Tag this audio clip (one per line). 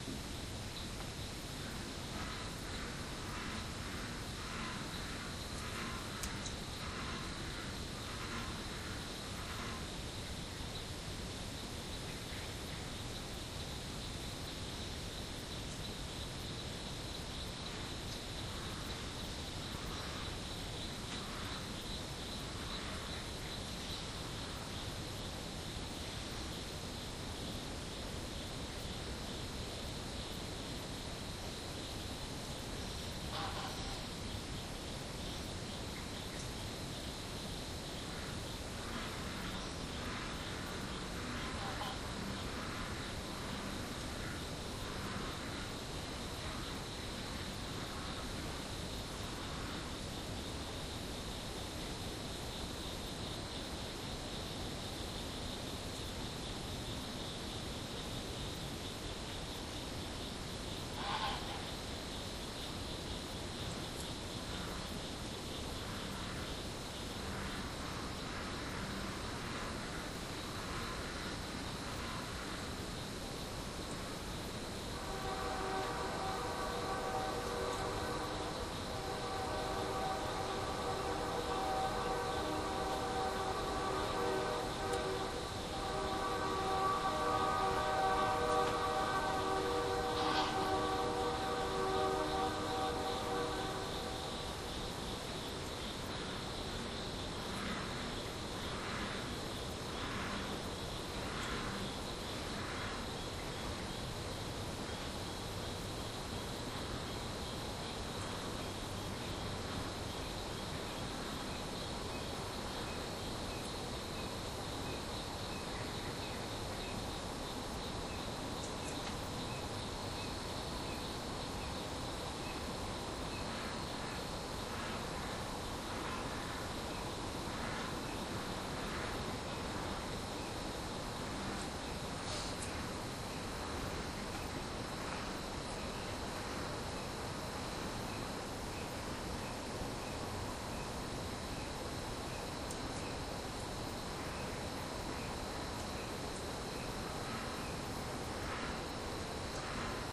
snore
space
poot
aliens
computer